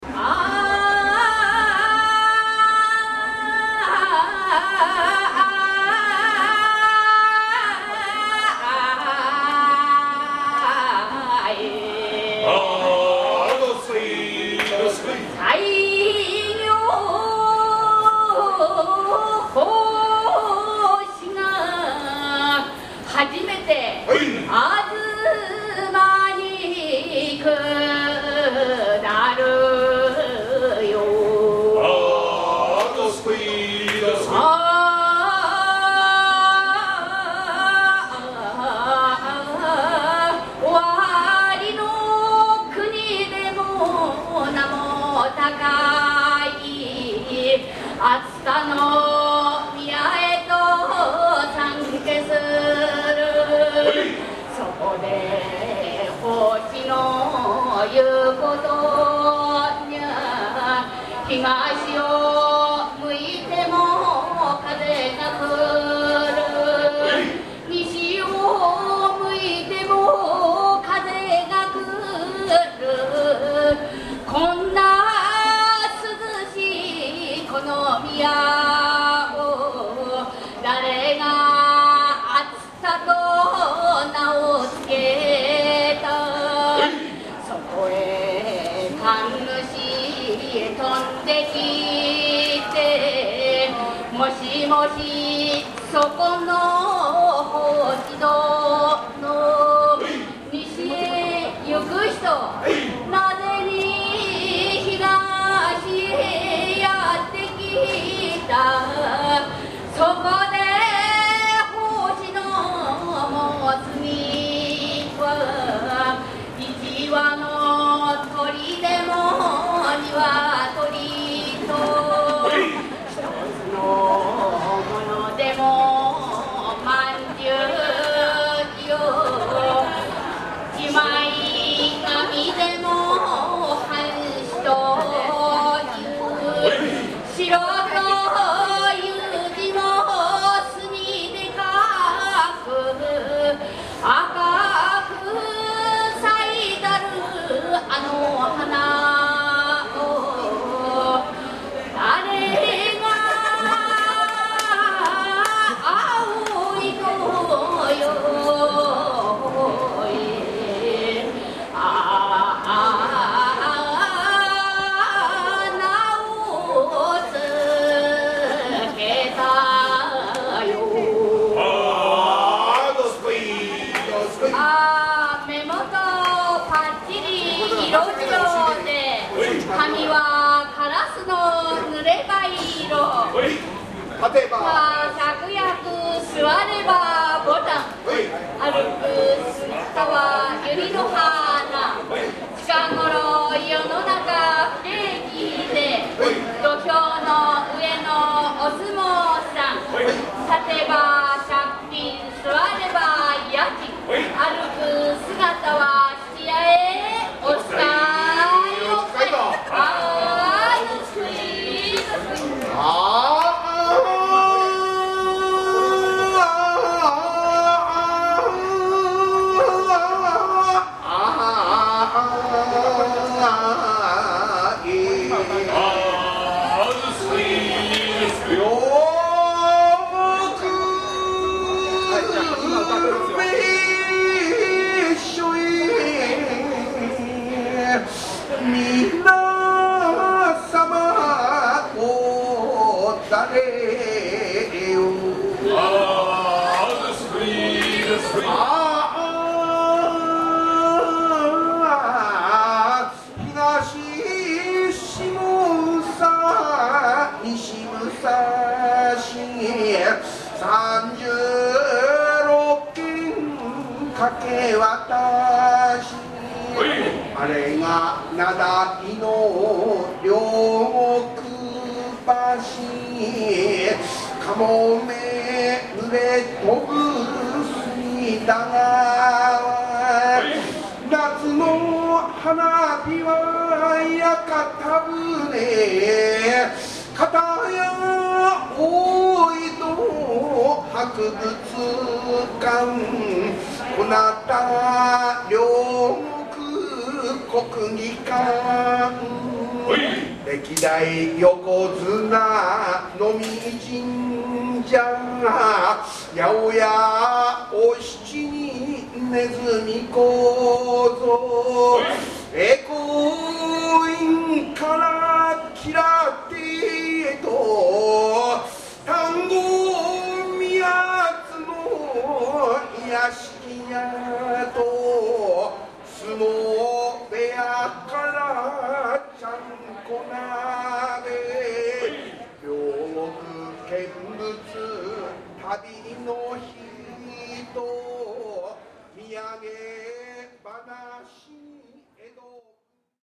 After Sumo Players
Following a Sumo tournament, as crowds exit they are serenaded by a troupe of traditional Japanese performers
Recorded at Ryogoku Kokugikan Stadium, Tokyo Japan
mini-disc